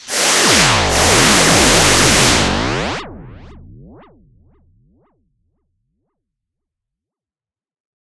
Estlack pneumatic crazflang 1
pneumatic high-speed drill, with crazy settings on flanger
hydraulic, machine, machinery, mech, pneumatic, robot